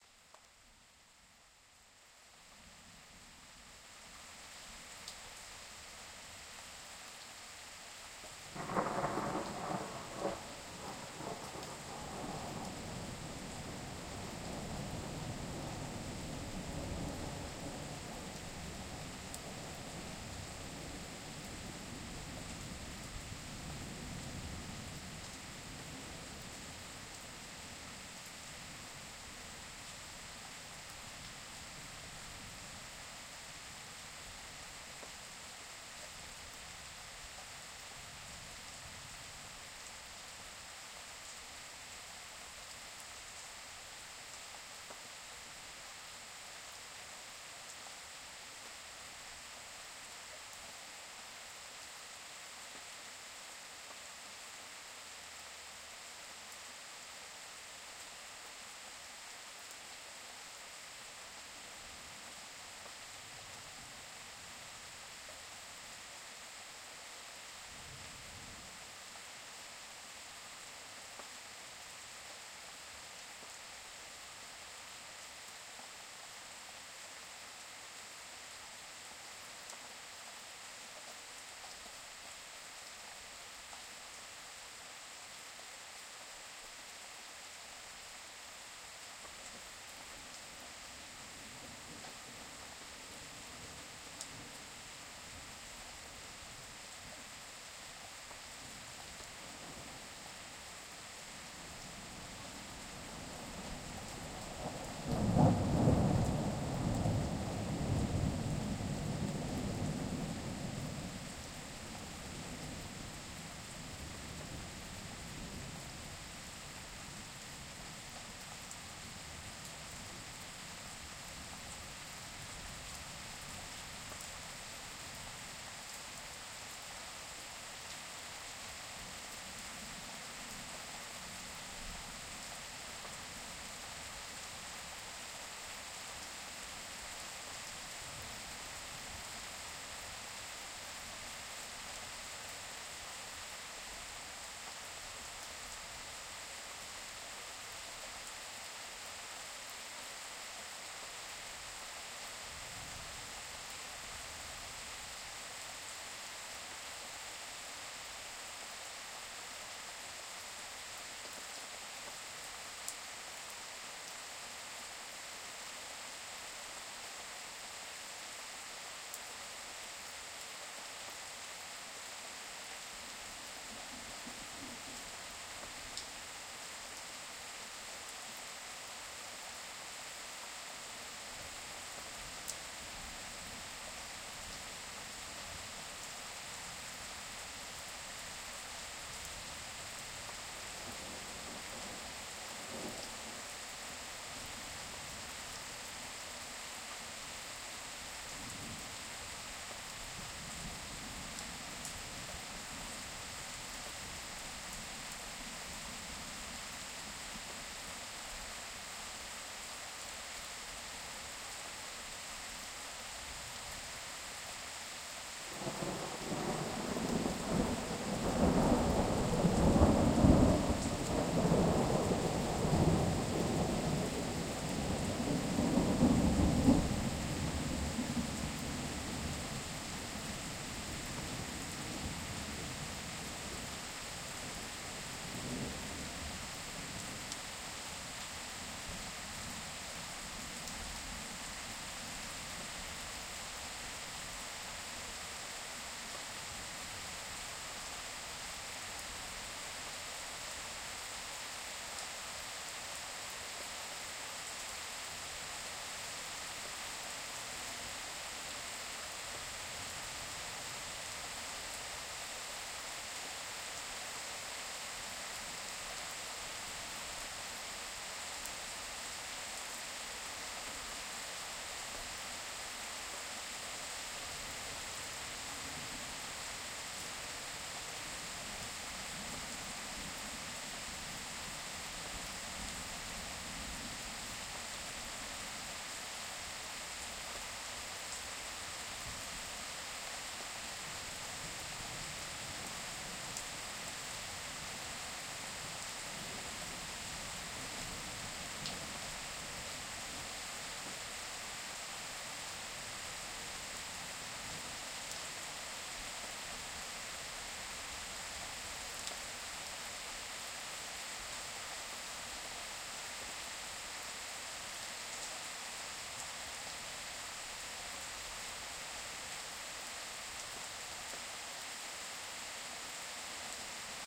Hard rain and thunder
Thunderstorm with lightnings and heavy rain recorded in my garden at night
rain, lightning, thunder, thunderstorm